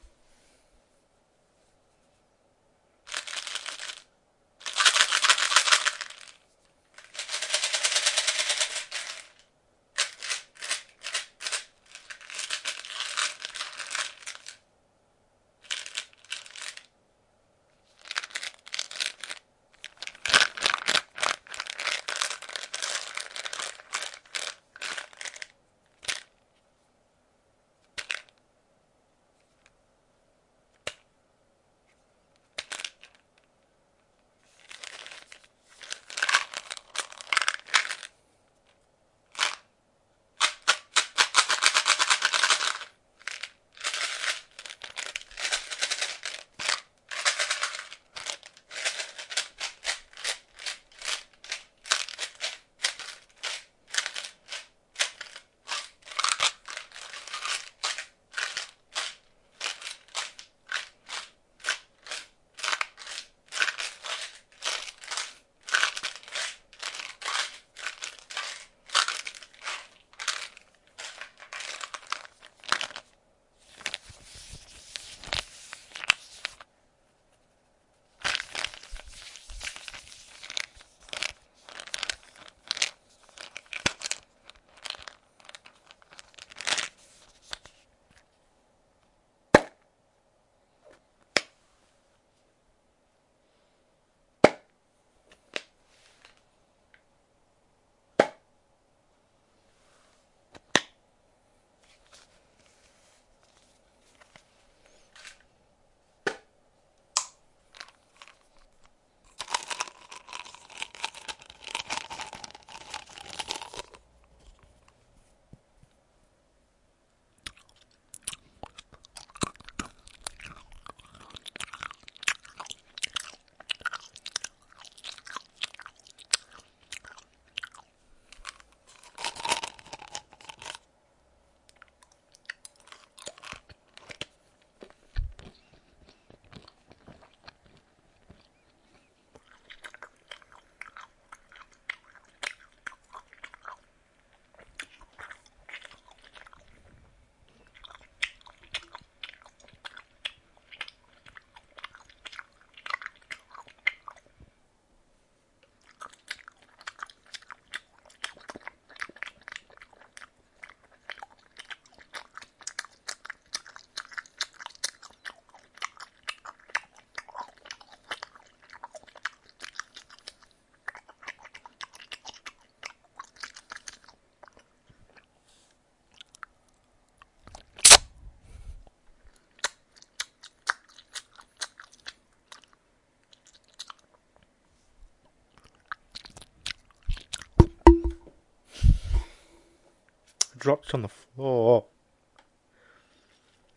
Join us through the amazing process of obtaining gum and then chewing it. This important process is responsible for 95% of all my sound effects.